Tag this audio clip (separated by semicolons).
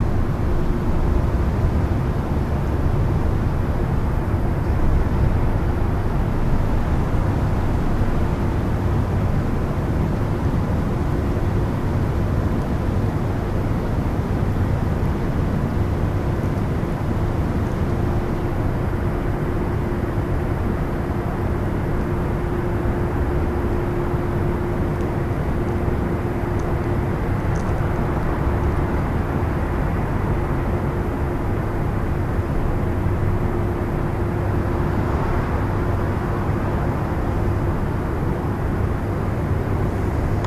ambience; lights; traffic; suburb; street; night